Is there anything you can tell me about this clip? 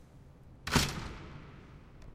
Glass and metal door of the UPF’s Tallers building hall closing. It has been recorded with the Zoom Handy Recorder H2 in the hall of the Tallers building in the Pompeu Fabra University, Barcelona. Edited with Audacity by adding a fade-in and a fade-out.